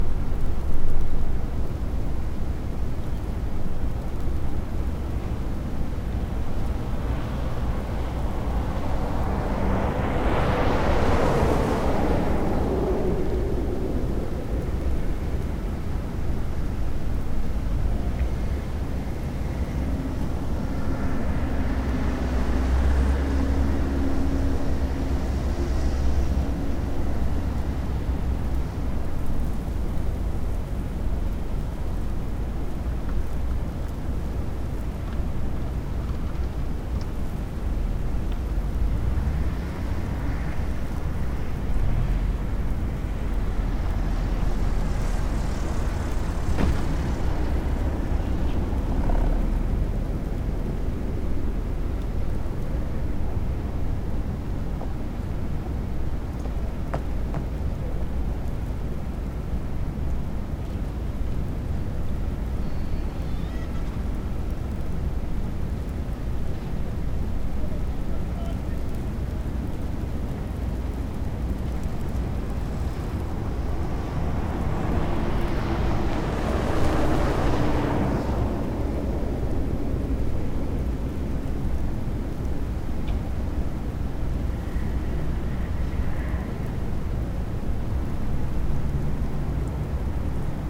121203 01 Winter city at night from the second floor, it is snowing, passing cars
Winter city moscow at night from the second floor, it is snowing, passing cars
Recorded mono with sennheiser mkh 816t and rycote
city, snow, night, winter, moscow